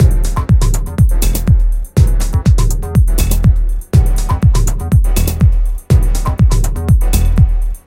Linearity Beat Part 05 by DSQT 122Bpm
This is a simple techno loop targeting mainly DJs and part of a construction pack. Use it with the other parts inside the pack to get a full structured techno track.
122bpm, beats, constructionkit, dj, electronica, house, loop, mix, music, part, remix, songpart, synths, tech, techno